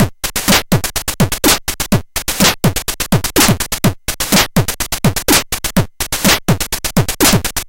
Atari ST Beat 10
Beats recorded from the Atari ST